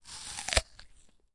apple - bite 02
Taking a bite of an apple.
apple, crunch, crunchy, food, fruit